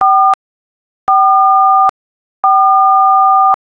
The '4' key on a telephone keypad.
4, button, dial, dtmf, four, key, keypad, telephone, tones